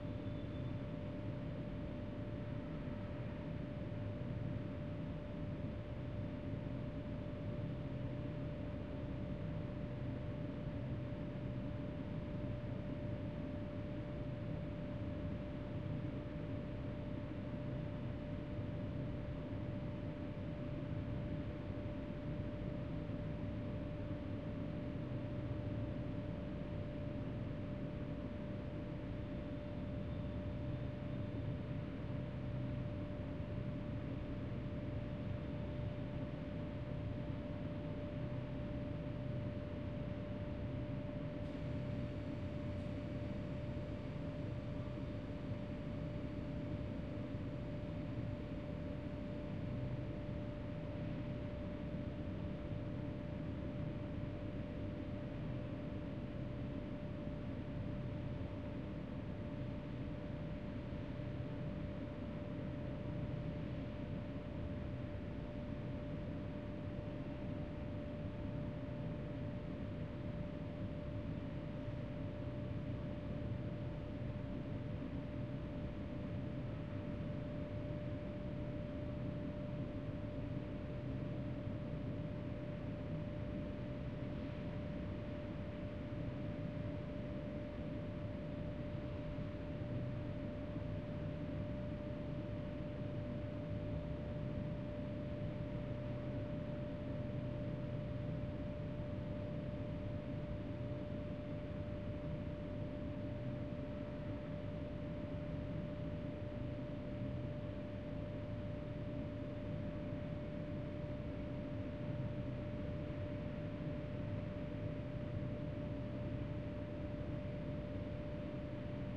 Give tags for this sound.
Room
Tone
Industrial
Indoors
Ambience
Office

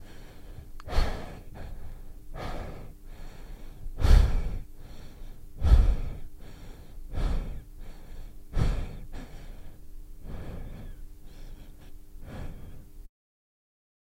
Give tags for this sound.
breath
breathing
deep
owi
slow

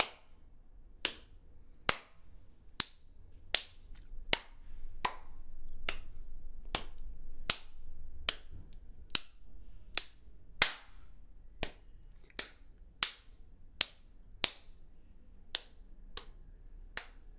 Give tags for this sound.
hard
skin
slap

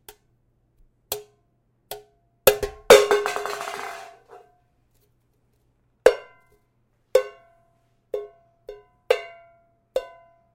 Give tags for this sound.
cai
Metal
Bucket
Balde
Hit

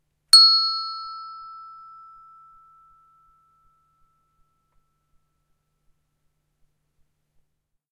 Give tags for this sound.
bright
single
hand
bells
bell

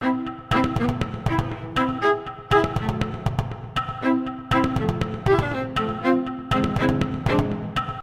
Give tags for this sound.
british
house
investigation
investigative
music
mystery

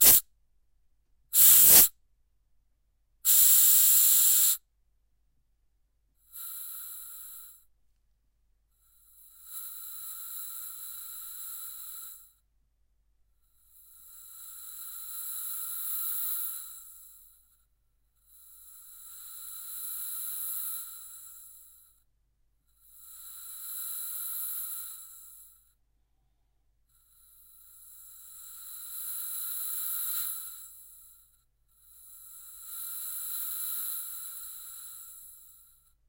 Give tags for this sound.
aerosol
gas
noise
spray
spraycan

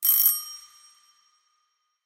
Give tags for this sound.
mechanical
Door
home
house
ring
Bell
ringing

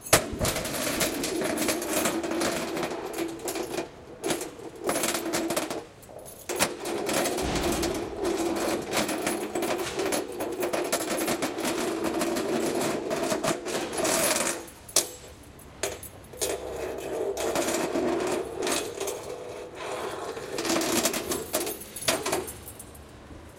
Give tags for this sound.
bench
contact
keys
metal
scraping

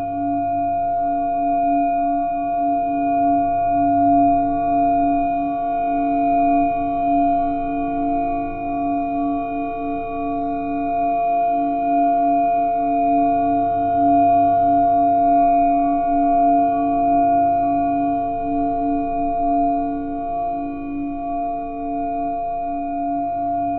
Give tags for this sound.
Bell
Time
Zero

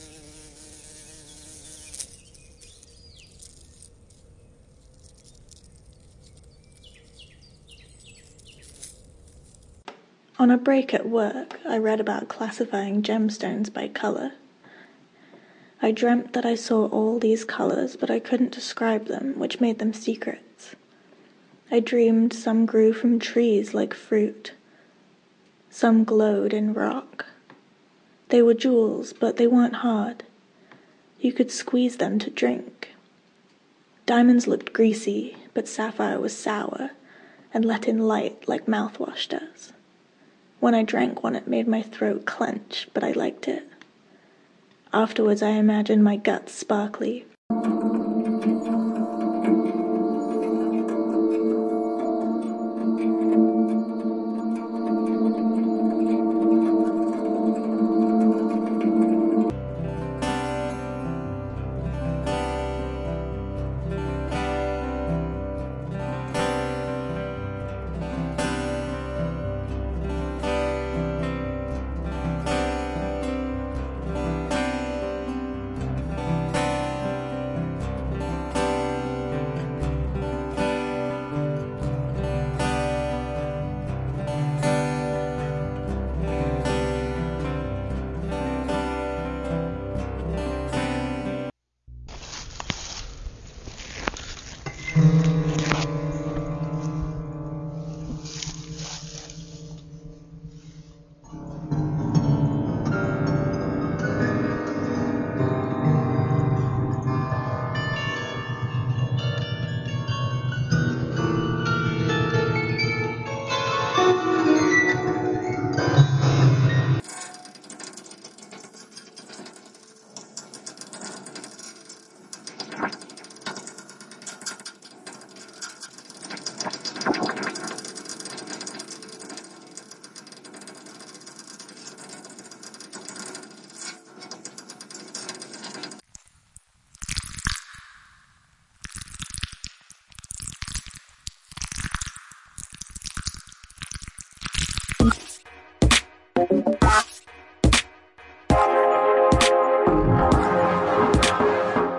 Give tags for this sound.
field-recording
electronic-hypnosis-program
morphagene
a-n-a
vocals
mgreel
make-noise-records
brett-naucke

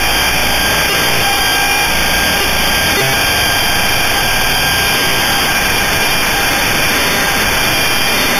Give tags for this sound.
buzzing
metallic

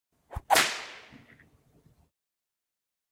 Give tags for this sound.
Cowboy
Foley
Horse
Western
Whip
Whip-Crack

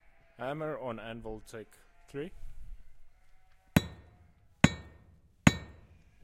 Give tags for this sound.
Anvil
Construction
Hammer
Industrial
Metal
Noise
OWI
Sledge
Steel

